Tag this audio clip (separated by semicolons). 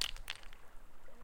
bang; nature; rocks